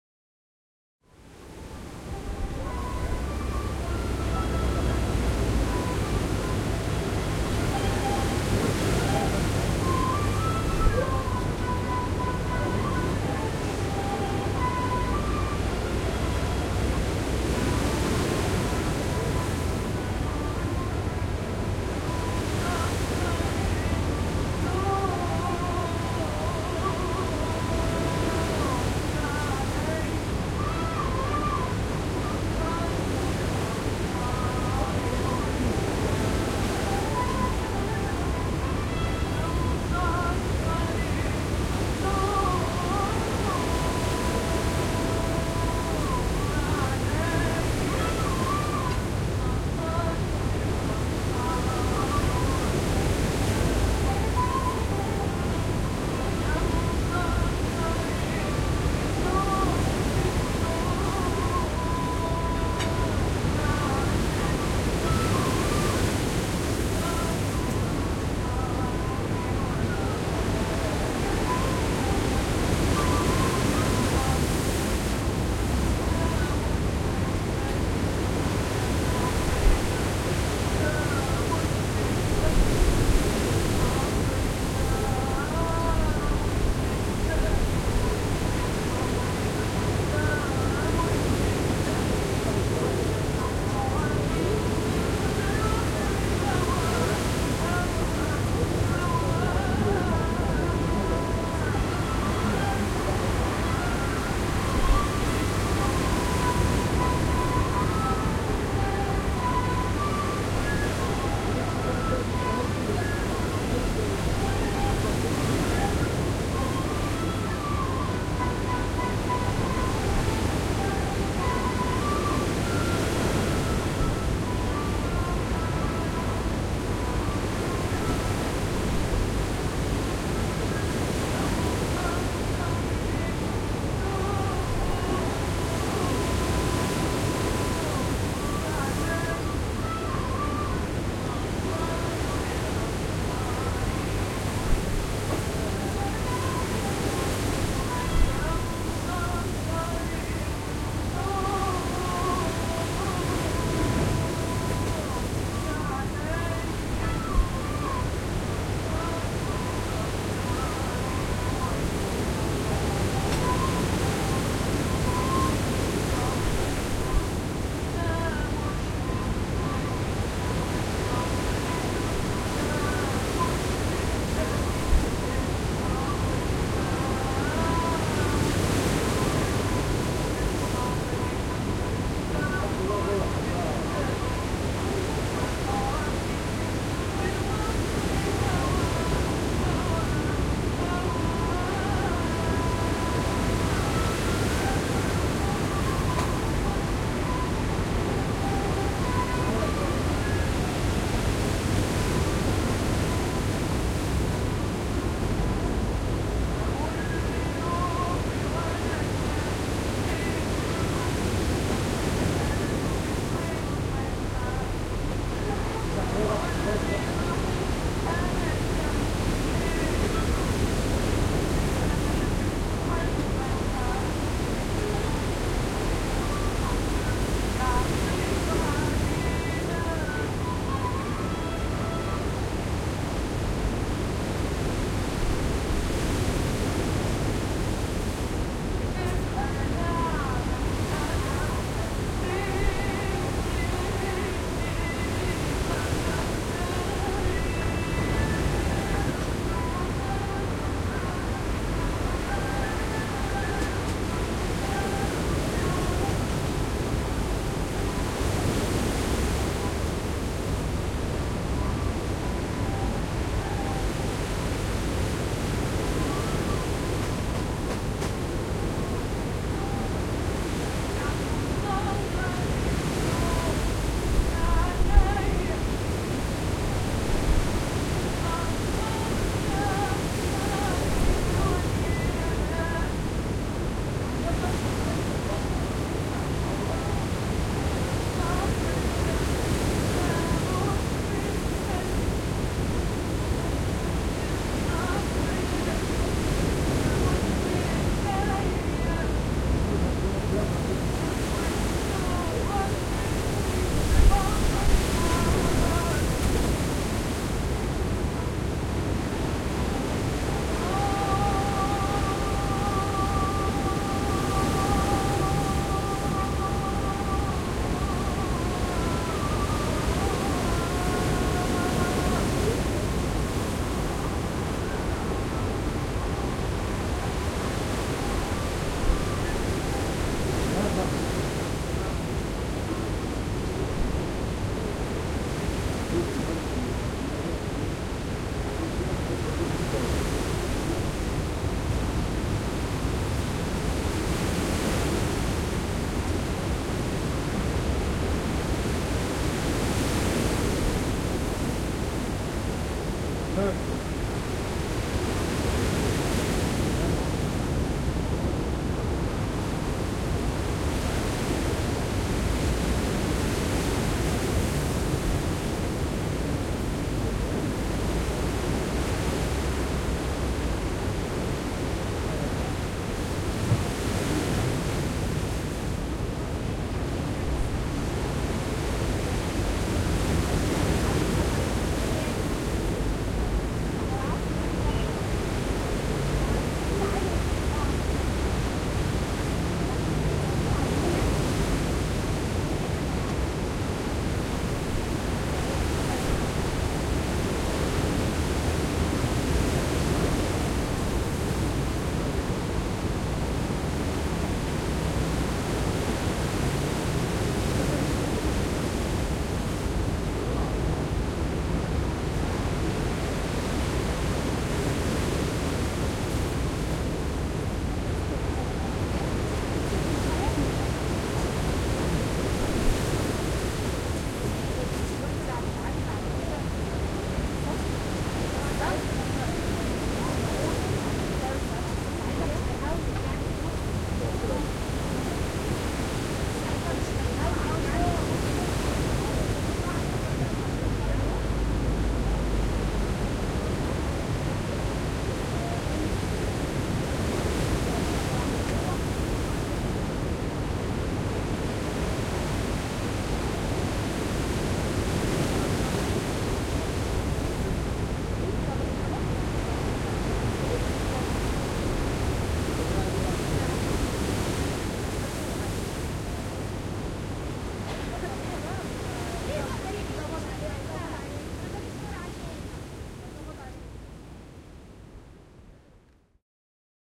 Coffee House in Alexandria
2014/11/23 - Alexandria, Egypt
10:30am Coffee house on the Meditterannean Sea.
Background music. Waves. Clients.
Close from New Alexandria Biblioteca.
ORTF Couple with windscreen
Coffee-House, Sea, Waves, Clients, Alexandria, Egypt